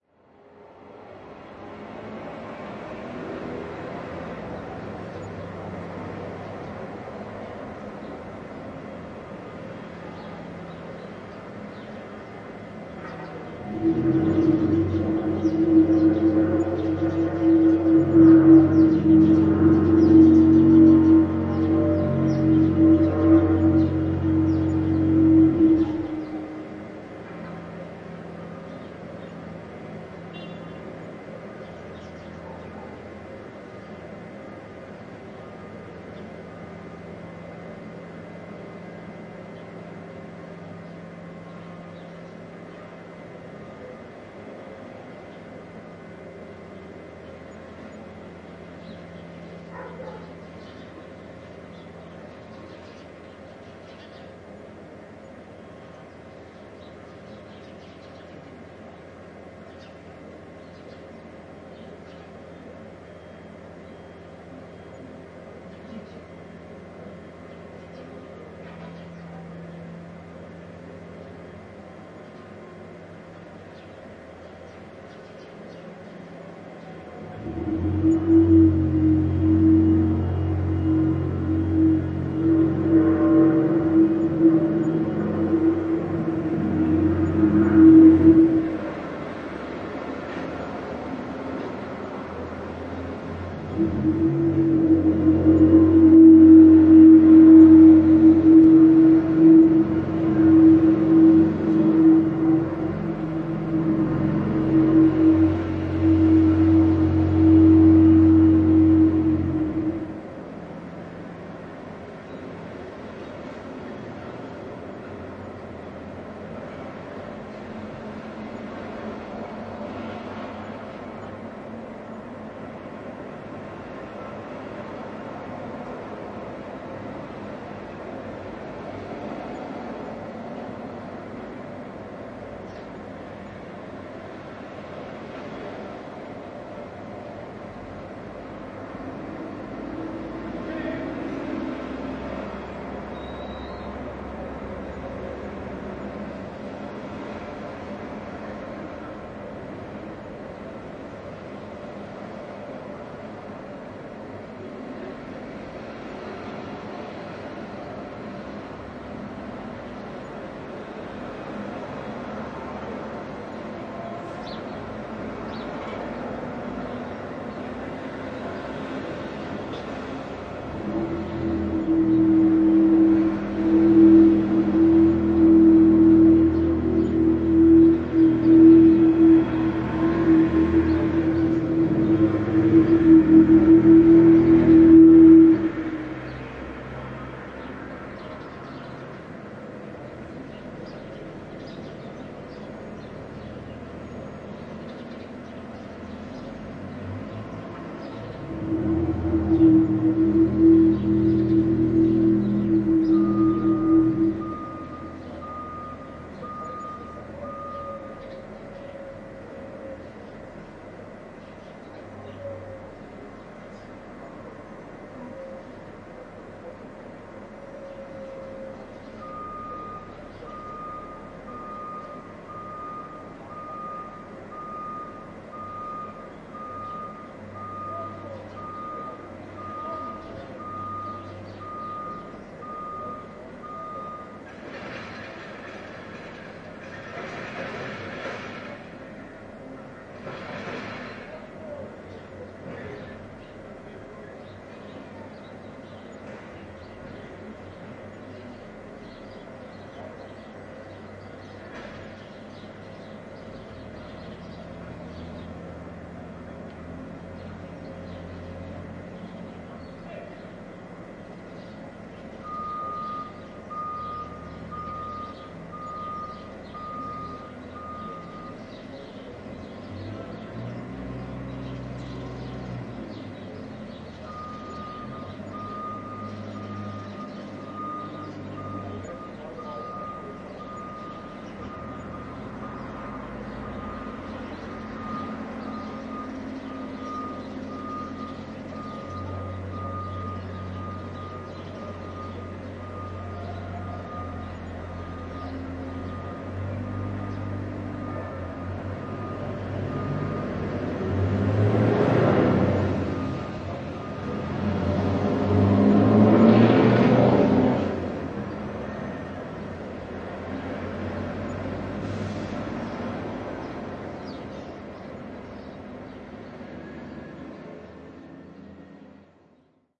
22.09.2015 roadworks ambience
22.09.2015: around 15.00. Roadworks on the national road no. 92 and the vovoidship road no. 138 in Torzym (Poland).
cars, equipment, noise, street, traffic